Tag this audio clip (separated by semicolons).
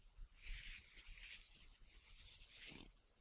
cloth; clothes; foley